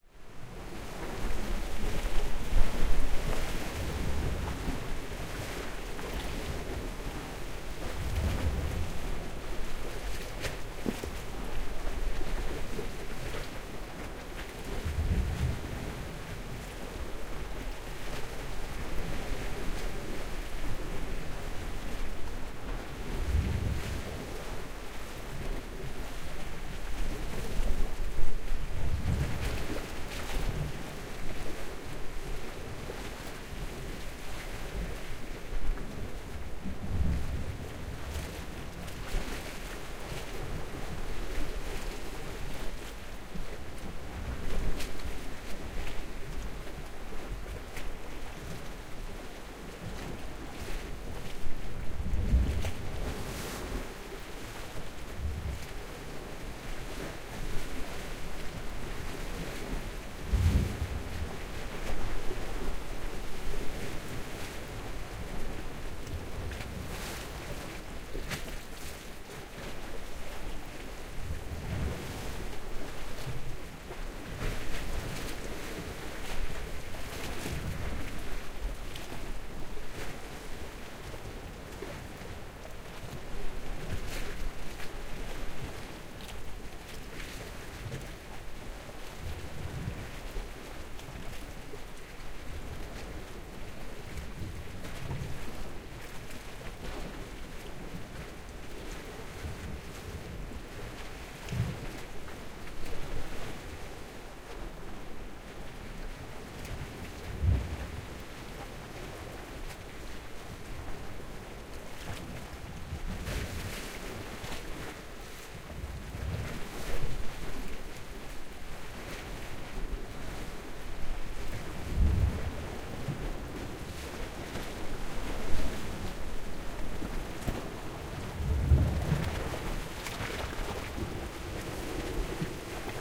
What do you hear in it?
Marseille - NagraVI + QTC50
Sea, Waves, Field-recording